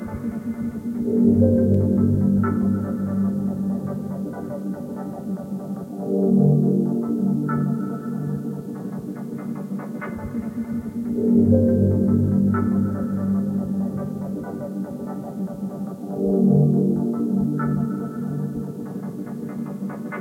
1.floating onwards
nice little layered synth loop thing
layered, melody, pad, synth